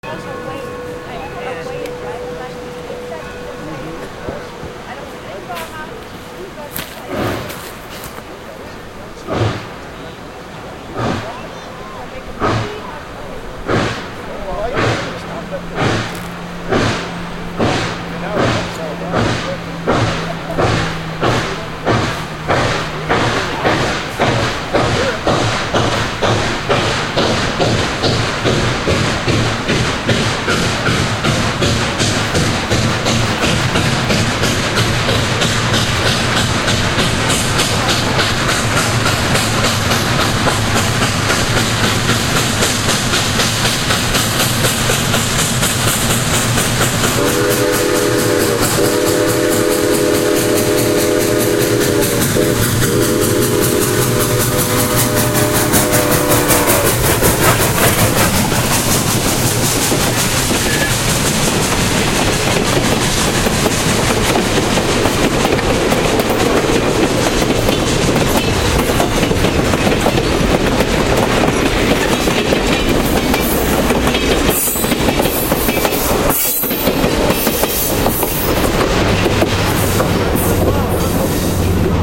Southern Pacific Engine #2472 comes through Niles Canyon, California
Chug, Rails, Forties, Trains, Rail, WWII, Train, Whistle, 40s, Chugging, Steam